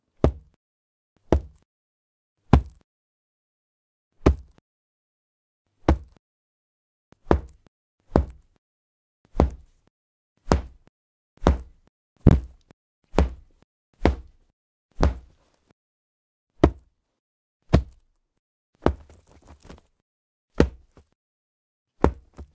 Falling on ground 1
By smashing and slamming a pillow on the couch, we created a foley sound that sounds like someone falling on the floor/ground or something heavy being dropped on the floor/ground.